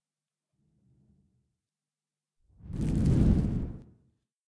Fireball Flyby 1
Casting a Fireball Sound
Recorded with Rode SE3
Used foil, plastic bags, brown noise and breathing gently into the microphone layered together using reverb for the tail and EQ to push the mid-low frequencies. Have not panned it from Left - Right for greater flexibility
This sound also features as a layer in many of my meteor sounds.
Projectile, Magic, fly-by, Spell, Fireball